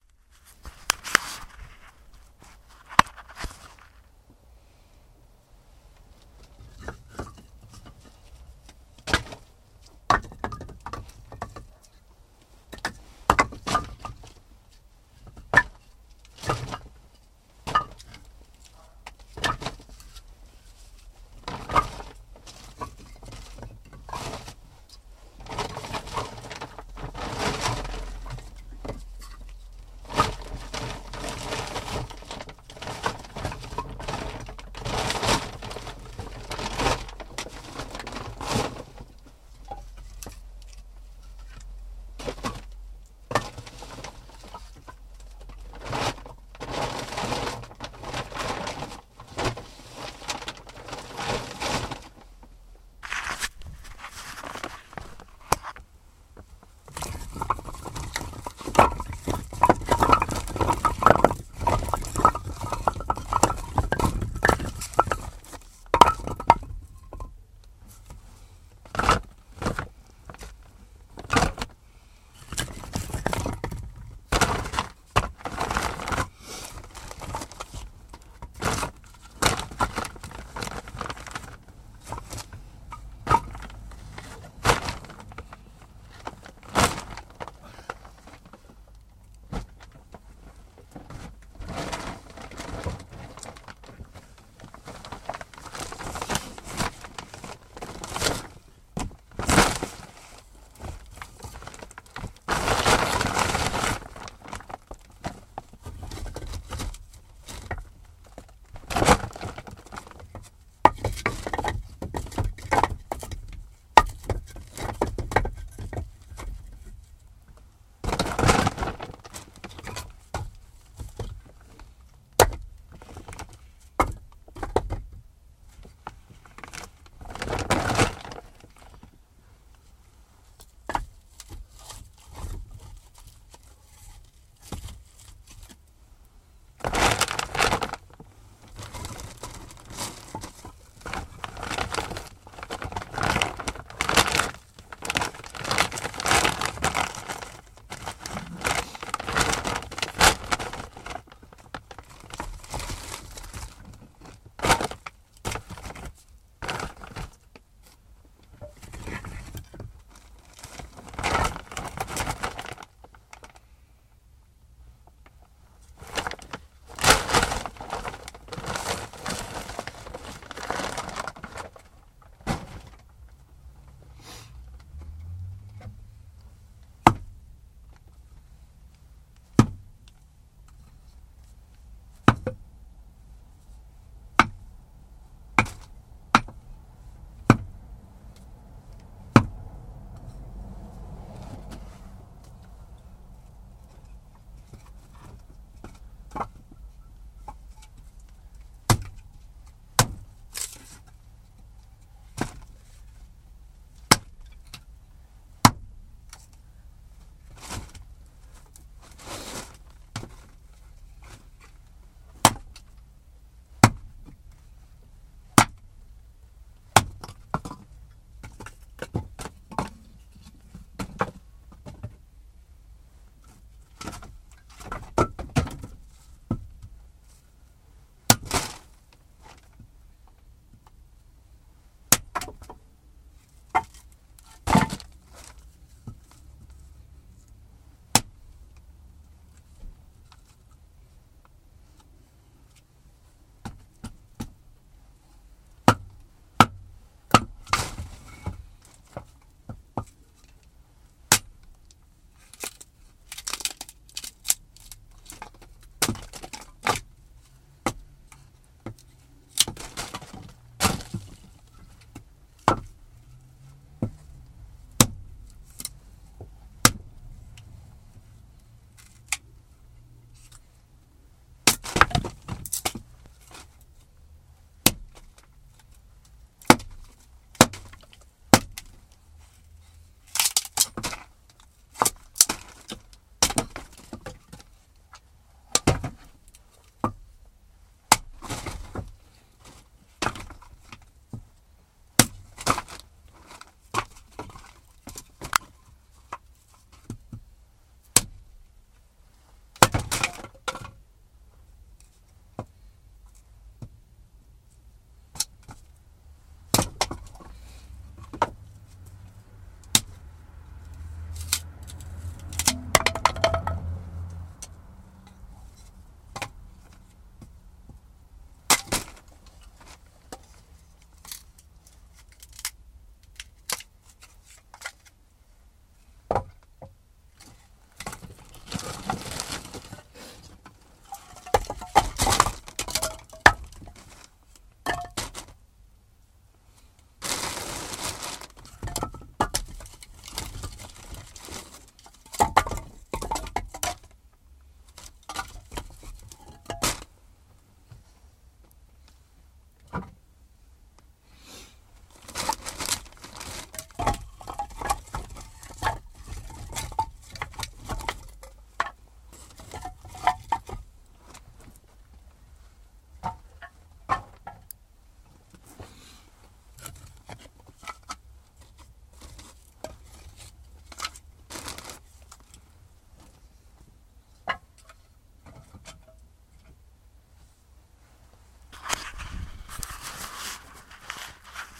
Falling logs in a woodshed
Recorded with digital recorder and processed with Audacity
collapsing, fall, wood, rumble, quake, shudder, blocks, shake, pile, logs, crashing, collapse, falling, rattle, rattling, crash, rumbling